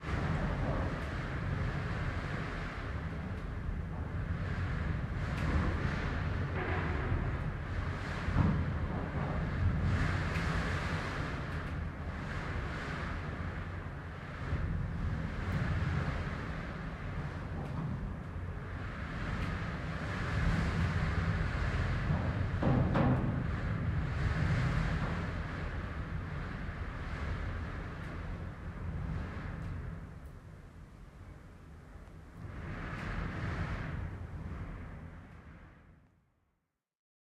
Strong Winds inside house 02
Another clip of strong winds outside while staying indoors. Recorded with my iPhone 11.
desert, interior, blow, sound, gusts, outdoor, cold, wind, house, storm, chilly, blowing, inside, winter, weather, windy, blizzard, strong, whistle, howling, rattle